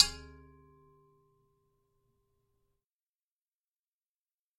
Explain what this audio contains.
Relatively soft impact, glancing blow